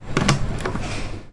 Sound of a locker key.